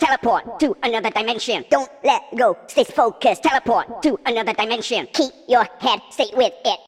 teleport vocals 140 bpm
remix of Mushino's "Hyperspace" vocals, combines samples from 2 parts.
I cut parts, sequenced, and eq'd the vocals into a 4-bar loop at 140 bpm.